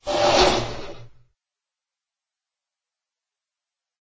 Sewing machine sound processed
Sewing machine
Recorded and processed in Audacity
factory, Hum, machine, machinery, mechanical, motor, sewing, whir